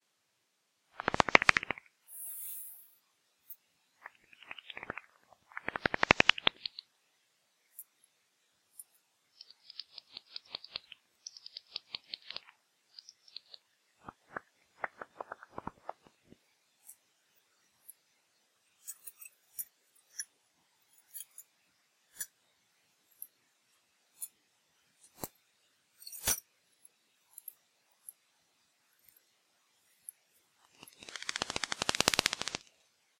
Bats in Highgate Wood
Bats, Field-recording, Nature